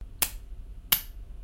E4 switch on
switch on and off a desk lamp
desk, lamp, switch